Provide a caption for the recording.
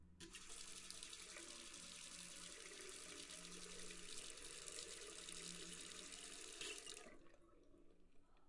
Tap water 2 (med)
Open water faucet is closed after a few seconds. This was recorded with a Tascam DR 7.
faucet, stream, water